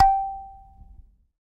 SanzAnais 79 G4 -doux a
a sanza (or kalimba) multisampled
african, kalimba, percussion, sanza